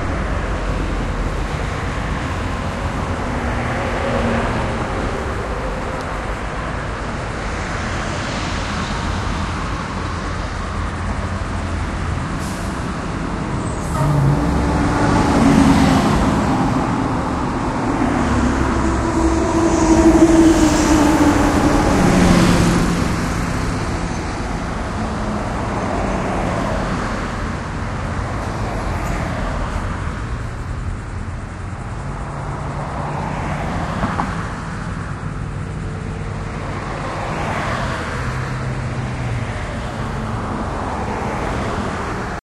traffic jupiter
Sounds of the city and suburbs recorded with Olympus DS-40 with Sony ECMDS70P. Sounds of the street and passing cars.
field-recording, traffic, city